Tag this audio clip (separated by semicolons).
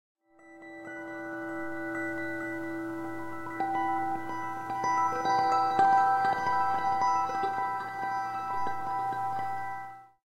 harmonics; guitar